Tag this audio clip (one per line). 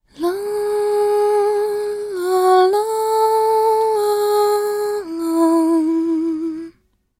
female
melisma
singing
vocal